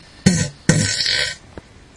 fart poot gas flatulence flatulation explosion noise weird beat aliens snore laser space